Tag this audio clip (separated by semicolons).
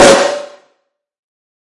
Crossbreed; Snare